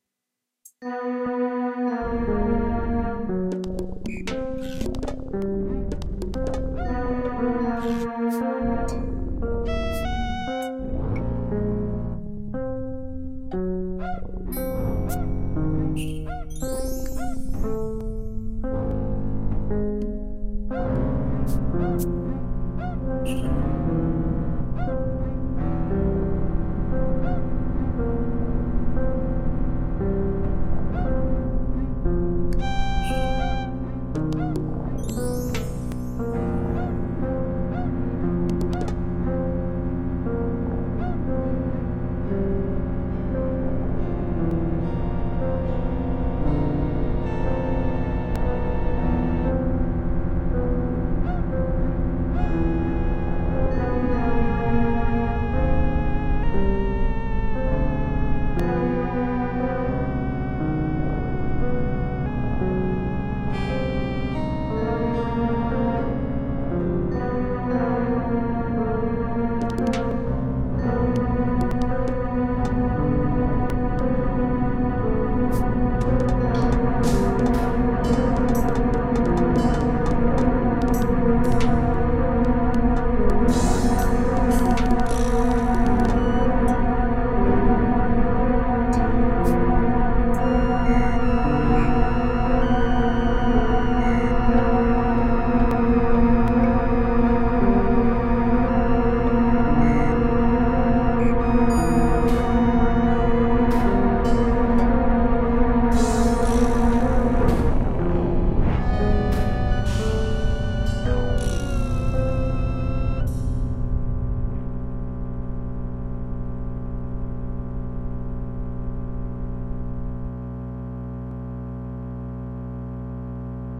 Paranoia Landing 2
This was originally a project for a class were I used a MIDI controller to input controller messages into a Korg NSR5 synthesizer. This is what I came up with it's all done with sliders and knobs. Enjoy!
Slightly different than the first one.